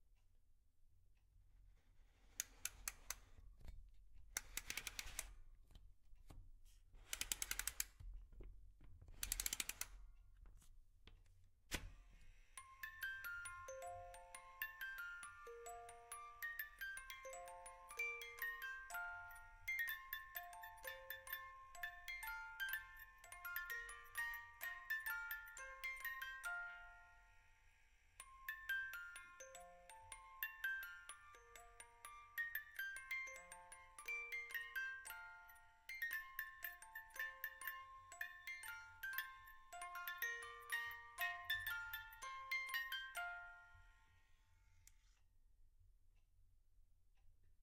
Recording of a Music-box winding and playing Jingle Bells twice before stopping.